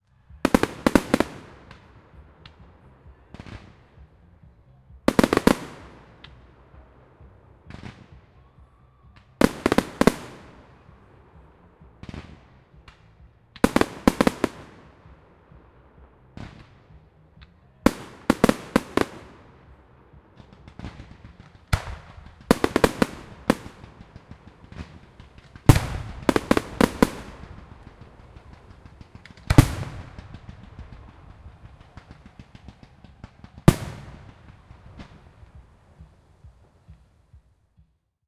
Raw audio of a fireworks display at Godalming, England. I recorded this event simultaneously with a Zoom H1 and Zoom H4n Pro to compare the quality. Annoyingly, the organizers also blasted music during the event, so the moments of quiet are tainted with distant, though obscured music.
An example of how you might credit is by putting this in the description/credits:
The sound was recorded using a "H4n Pro Zoom recorder" on 3rd November 2017.